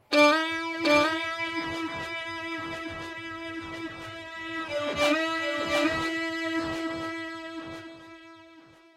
another fiddle recording, this one less melodic and with a bit of subtle processing